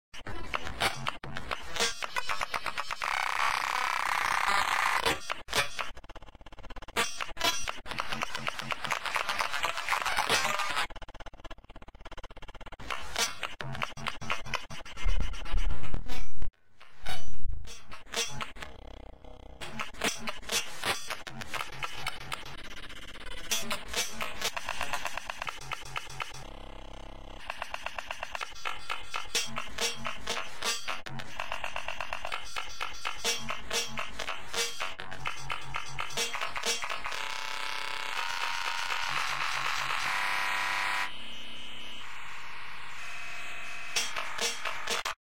One in a small series of weird glitch beats. Created with sounds I made sequenced and manipulated with Gleetchlab. Each one gets more and more glitchy.
bass, beat, click, drum, electronic, glitch, snare, sound-design, weird